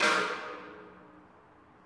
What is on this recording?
I took my snare drum into the wonderfully echoey parking garage of my building to record the reverb. Included are samples recorded from varying distances and positions. Also included are dry versions, recorded in a living room and a super-dry elevator. When used in a production, try mixing in the heavily reverbed snares against the dry ones to fit your taste. Also the reverb snares work well mixed under even unrelated percussions to add a neat ambiance. The same goes for my "Stairwell Foot Stomps" sample set. Assisted by Matt McGowin.